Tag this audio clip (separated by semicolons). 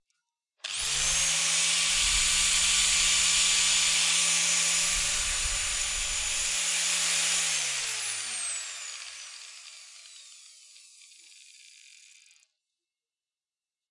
metal; OWI; workshop; steel; ting; iron